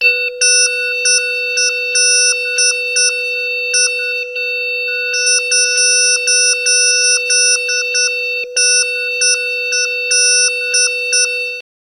Dustette 12 sec
Sounds of data being transferred....recorded from a Kork NX5R Sound generator.
Data, Sounds, Strange, Transfer